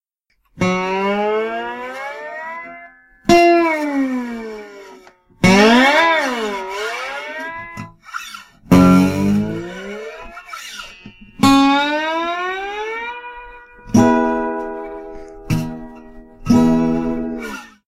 A guitar.
Recorded with an Alctron T 51 ST.
{"fr":"Guitare 1","desc":"Une guitare.","tags":"guitare acoustique musique instrument"}